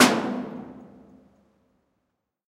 Recordings of different percussive sounds from abandoned small wave power plant. Tascam DR-100.
industrial, metal, hit, fx